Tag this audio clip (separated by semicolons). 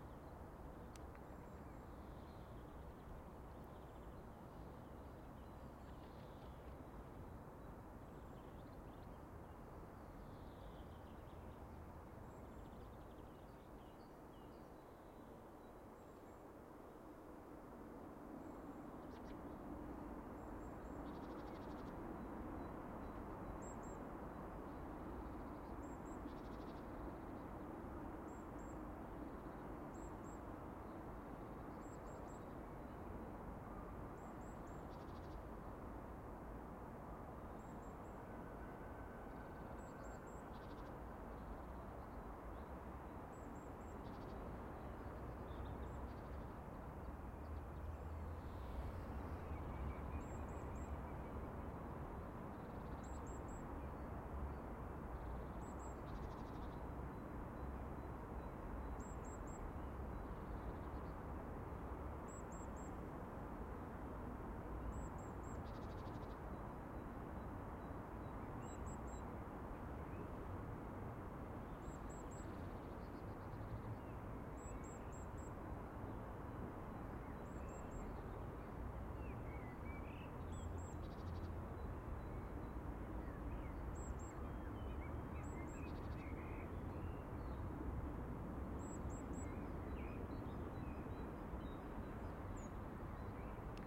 springbirds; birds; park-ambience; ambience; spring; park; spring-ambience